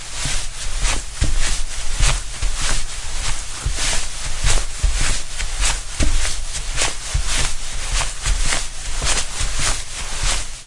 Walking on carpet
Technically, walking in PLACE on a carpet, but they don't need to know that. (Who's they? I have no idea. I just needed words to type into this box.)